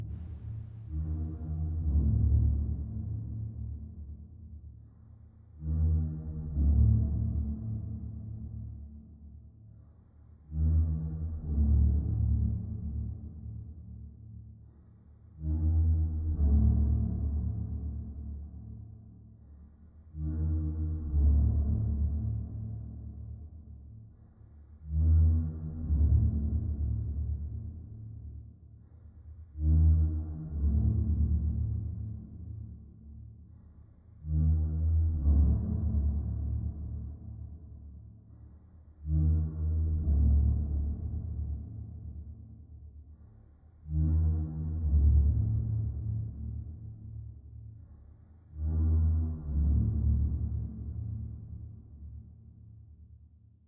Deep Bass Horror Loop (Reverb Version)
down pitched squeaky drawer
ambient, atmosphere, atmospheric, bass, deep, double, double-bass, drawer, Horror, loop, low, pitched